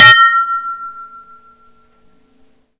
Samples of tools used in the kitchen, recorded in the kitchen with an SM57 into an EMI 62m (Edirol).
dish, hit, kitchen, metal, percussion, ping, unprocessed